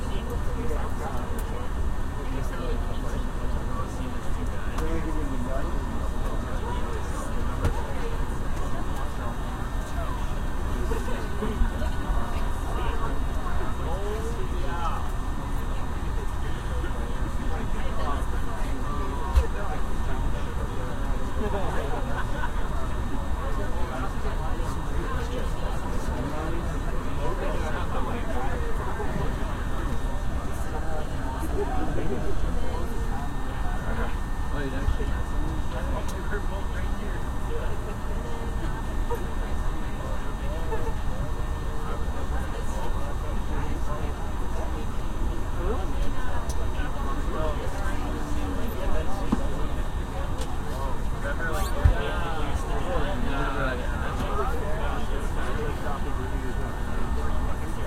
Aircraft, economy class, language neutral (some discernible French and English words), Canada